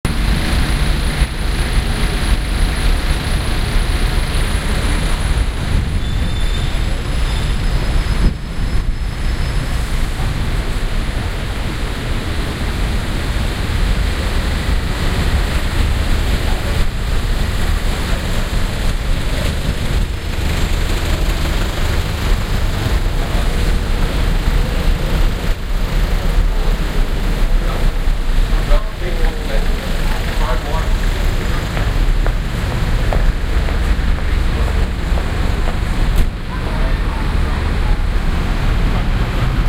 Charing Cross, taxis outside
field-recording, atmosphere, london, general-noise, background-sound, ambiance, ambience, ambient, soundscape, city